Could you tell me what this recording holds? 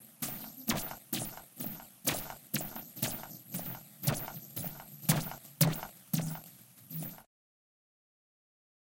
ns monsterfootsteps
A manipulated recording of gravel and walking up wooden stairs to make space themed footsteps